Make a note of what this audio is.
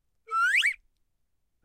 Slide Whistle up 01
Slide Whistle - effect used a lot in classic animation. Pitch goes up. Recorded with Zoom H4
silly, soundeffect, whistle